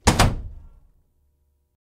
Wooden Door Closing Slamming